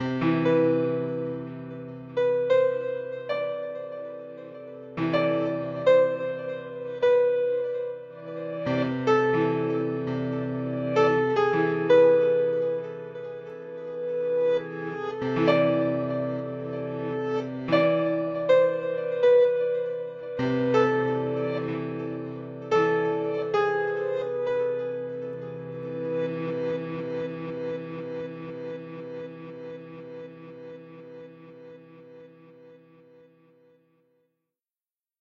PianoAbstract ubik
I wrote and designed this little "abstract-piano" piece exclusively for TheFreeSoundProject. Haven't seen too many abstract-type piano sounds out there, maybe I'm just weird like that, lol.. Merry Christmas & a Happy New Year to all - 2007!